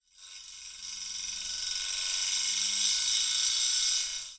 Recording of a portatil fan working while i put a guitar string on its blades. Can emulate the sound of an old car motor or an electrical saw.
motor, foley, machine, recording